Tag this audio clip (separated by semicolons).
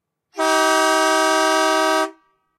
air; alberta; big; horn; industrial; loud; oil; rig; traffic; truck